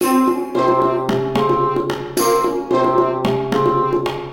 mono copy toam oriental touch orchestra
Processed in audacity to make it mono - no other modification.